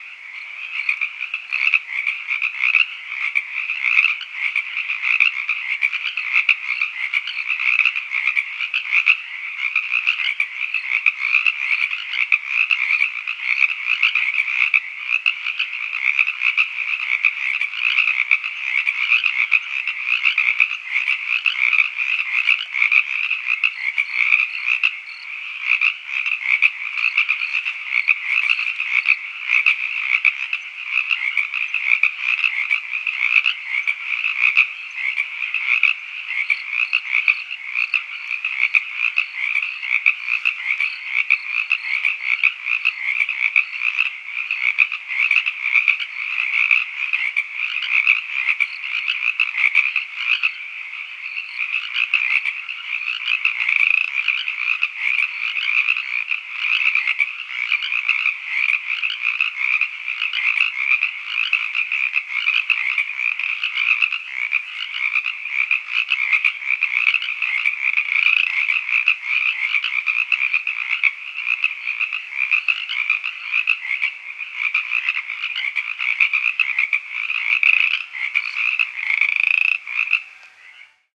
Aggressively (but effectively) EQ'd to remove a nearby gas generator. This is excerpt two of two.
crickets, frogs, night, stream, swamp